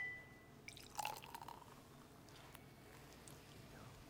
Pouring Champagne
The sound of someone pouring bubbly champagne into a bottle.